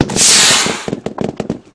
Firing Mortar at Shooting Range
gun, military, colt, mortar, gunfire, weapon, commando, artillery, army, shooting, training, range, m4a1, soldier, machine, shot, violence, terror, projectile, fire, arms